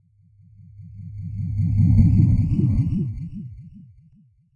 electronic insects in the digital jungle